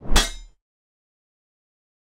Whoosh+Sword Hit Armor
This hit sound was made by banging a kitchen knife against my grandmother's cooking pot (but don't tell her).
A lot of down pitching and layering to achieve something close to fantasy armor hits, even though real armor really makes unsatisfying sounds when hit.
recorded/mixed/created by
Patrick-Raul Babinsky
Do not forget to credit :)